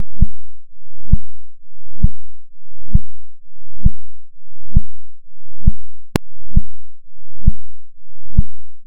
Palpitation is a sound that trys to reproduce the sound that make the hearth during a stress period. I generated a sound at 110 Hz with the “dent de sie” effect. Then i change the duration of the sound to make it very slow.